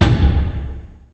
Deep metal clang
A deep metallish sound I used for the menu of some computer game once...